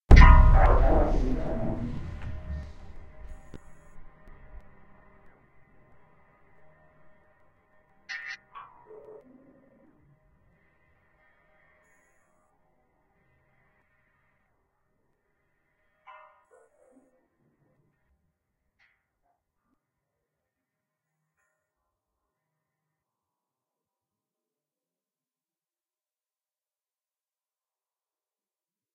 bas gdwl hit
quiet lowercase sound